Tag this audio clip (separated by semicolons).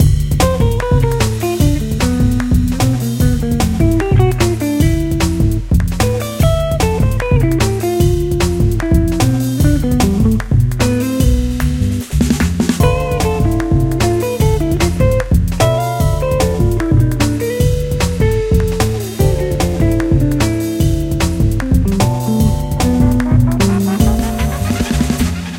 dorian; music; jazz; loop; 150bpm; korgGadget; D